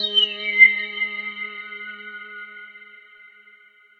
THE REAL VIRUS 10 - RESONANCE - G#3
High resonances with some nice extra frequencies appearing in the higher registers. All done on my Virus TI. Sequencing done within Cubase 5, audio editing within Wavelab 6.